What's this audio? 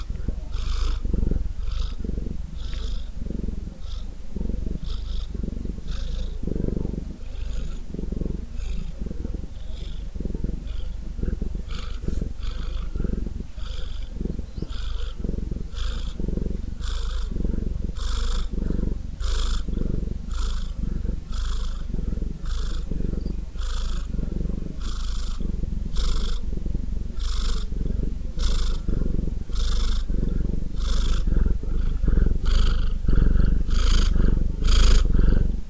cat's purring2
he is quite joyful always playing purring and ready to be pet. no specific breed just simple cat
close miced holded in hands with RODE NT1 mic straight into soundinterface with a max gain to unlock the mic's snr. then noise reduced by spectrum fft cancelation using IZOTOPE RX 7 SPECTRAL DENOISE
nt1, purr, rode, purring, cat, animal, purrs, asmr, close-mic